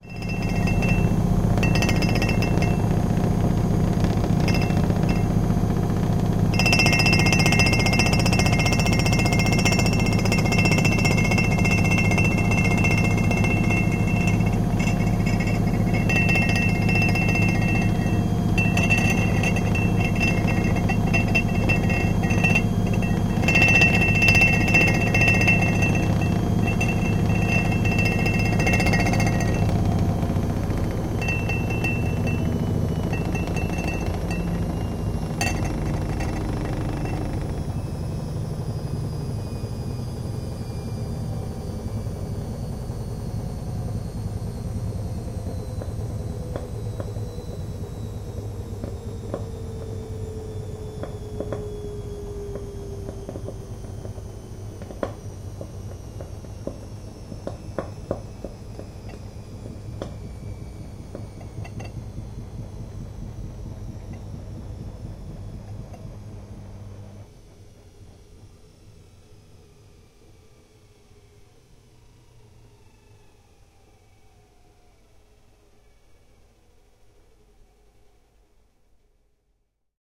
Two glasses standing on top of a washing machine in action.
Recorded with Zoom H2. Edited with Audacity.
vibrator, glass, rumble, vibration, washing-machine, washer, clang, collision